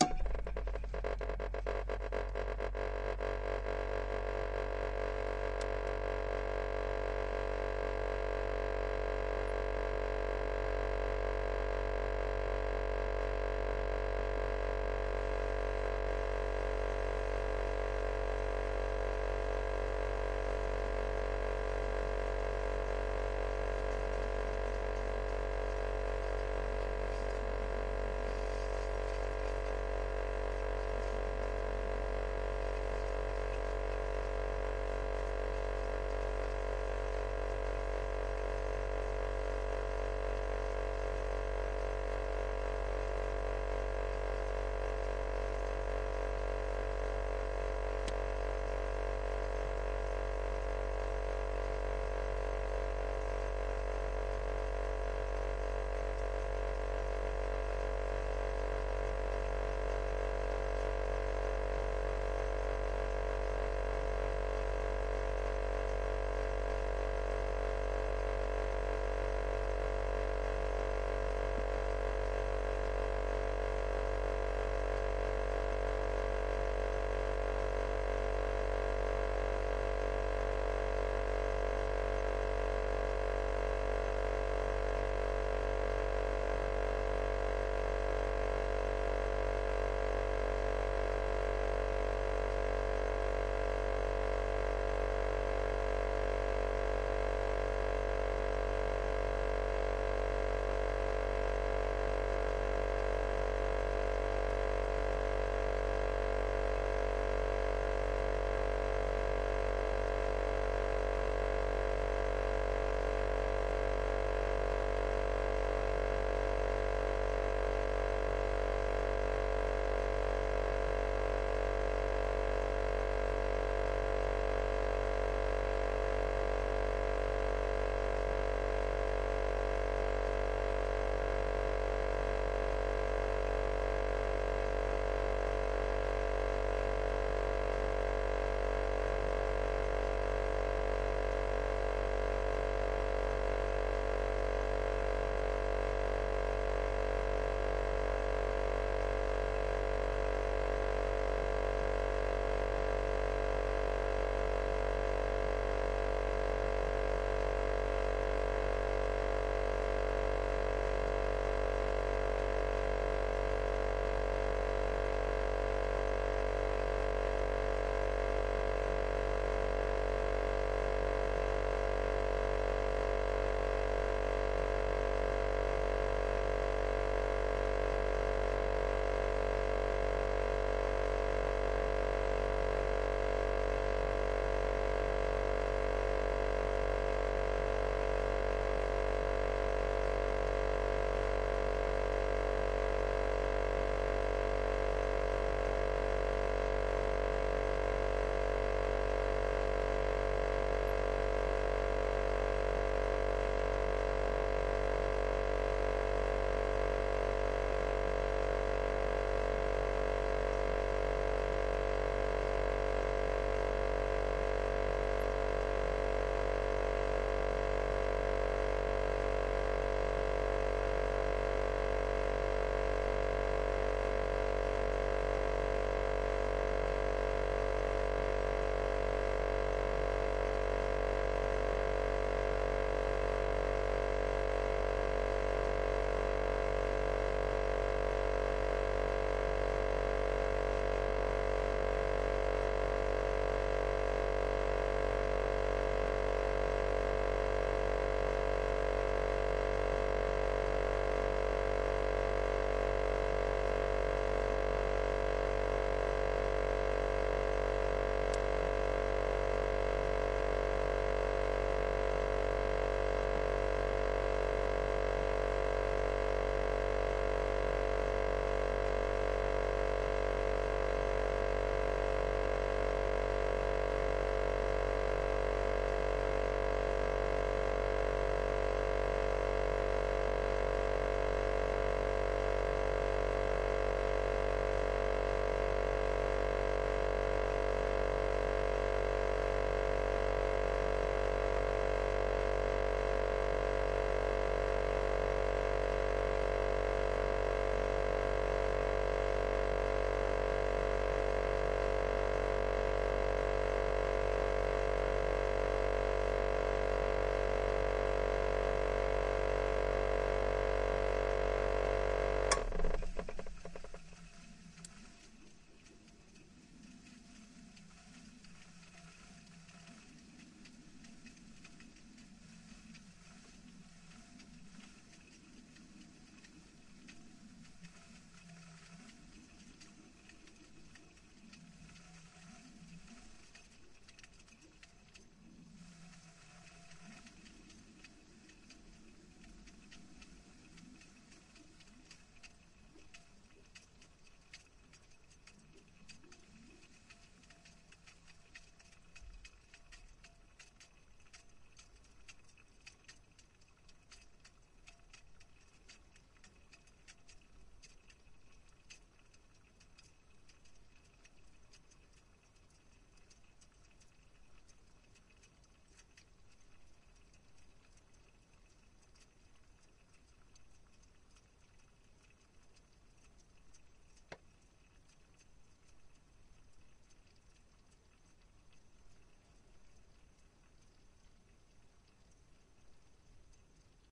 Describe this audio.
buzzing fridge
The recording was made inside of an refrigerator by a Zoom H2 Handy Recorder
appliance, buzzing, buzz, domestic, fridge, motor, house-recording, field-recording, kitchen, refrigerator, machine, hum, home, humming, household, engine